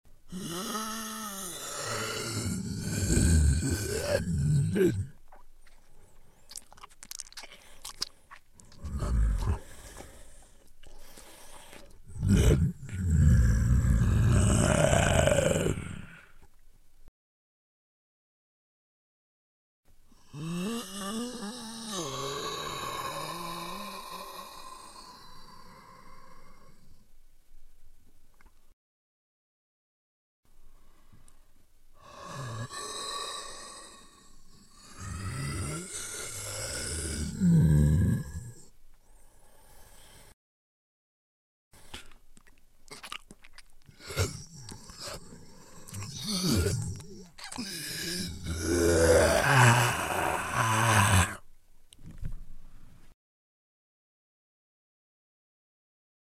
a single Zombie roaring, drooling, eating, making noise
Zombie isst, schmatzt, stöhnt, brüllt